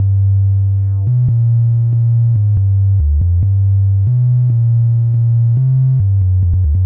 Bass Weird Flow - 4 bar - 140 BPM (no swing)
140-bpm, low, synth, loop, fruityloops, bass